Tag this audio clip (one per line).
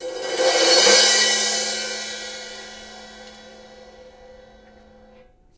1-shot
crash
crash-cymbal
crescendo
DD2012
drums
mid-side
percussion
stereo